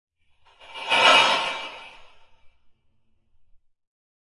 This is a (lo-fi) metallic clatter/rattle sound.
I started with a synthesized sound, heavily time stretched it, applied effects, time compressed it to make it short again and once more applied effects.
I used Sound Forge and the effects used were (reverse) delay, reverb, filters, distortion and others.
I would like to know and hear/see the results of what you've done with my sounds. So send me a link within a message or put it in a comment, if you like. Thank You!